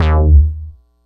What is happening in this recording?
multi sample bass using bubblesound oscillator and dr octature filter with midi note name